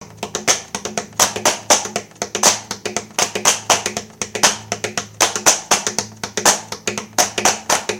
FROWNY FACE1 Percussion
A collection of samples/loops intended for personal and commercial music production. For use
All compositions where written and performed by
Chris S. Bacon on Home Sick Recordings. Take things, shake things, make things.
acapella, acoustic-guitar, bass, beat, drum-beat, drums, Folk, free, guitar, harmony, indie, Indie-folk, loop, looping, loops, melody, original-music, percussion, piano, rock, samples, sounds, synth, vocal-loops, voice, whistle